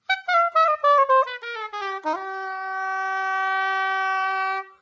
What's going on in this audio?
Non-sense sax played like a toy. Recorded mono with dynamic mic over the right hand.